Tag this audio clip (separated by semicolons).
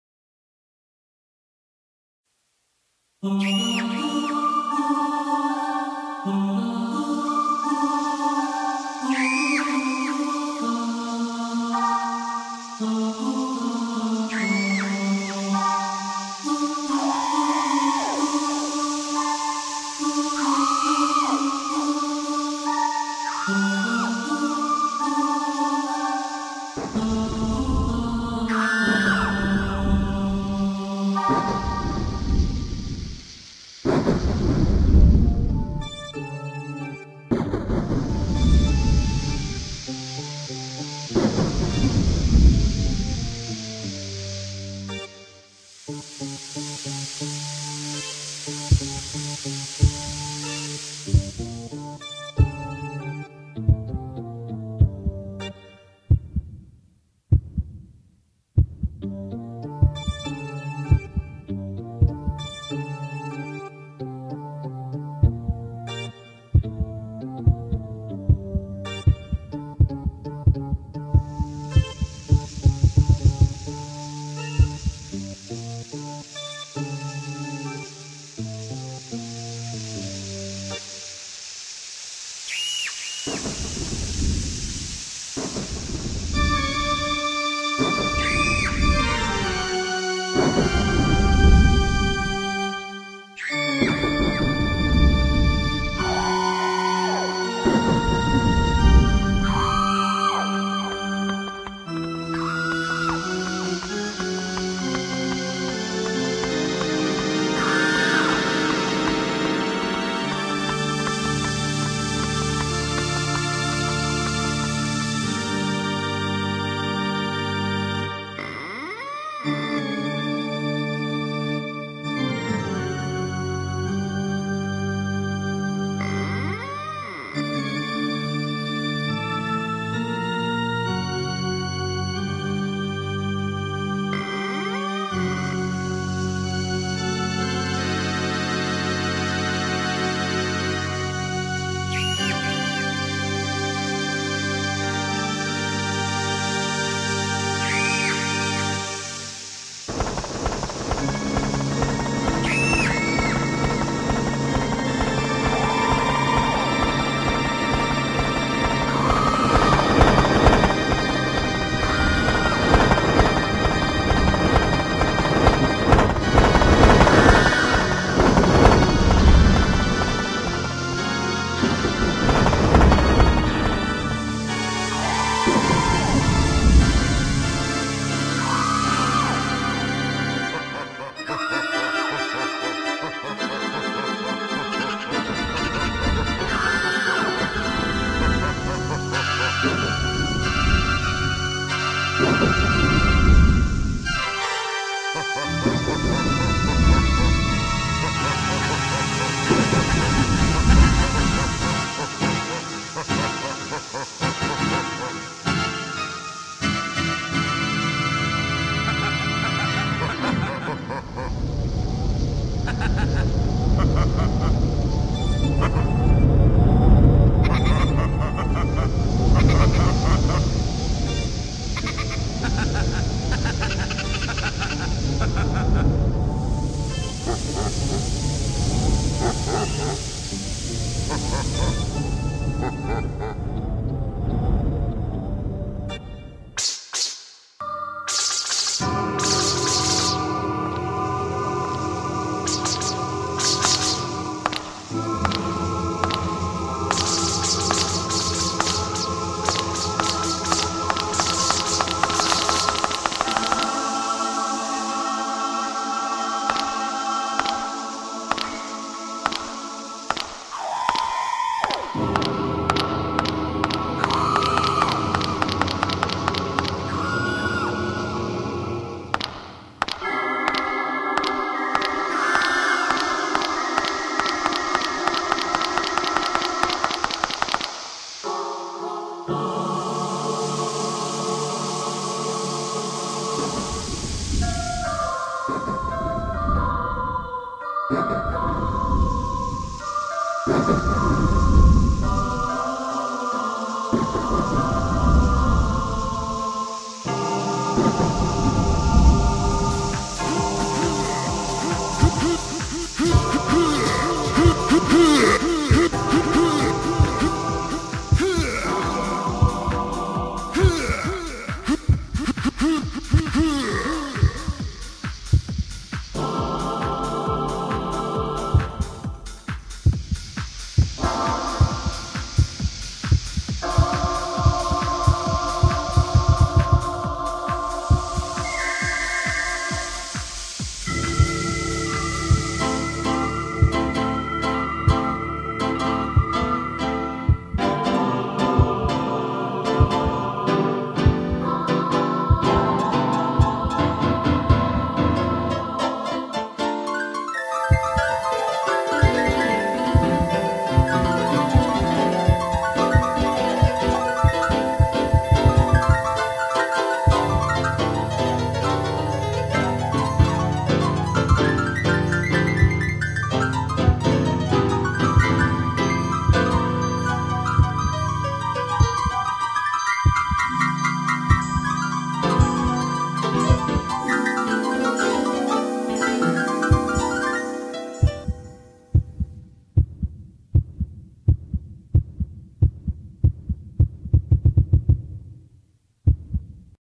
aliens; ghosts; halloween; haunting; scary; screams; spacey; spooky